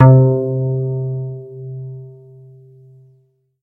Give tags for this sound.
Dexed-Harp
DX-7-Harp
DX-Harp
Electric-Harp
FM-Harp
Harp

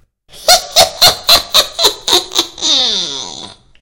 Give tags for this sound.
creepy
evil
fear
fearful
ghost
Halloween
haunted
hell
horror
nightmare
old-lady-laugh
scary
scary-sound
scary-sounds
sinister
spooky
spooky-sound
spooky-sounds
terrifying
thrill
weird
Witch
witch-cackle
witch-cackling
witches
Witch-laugh
witch-laughing
witch-laughter

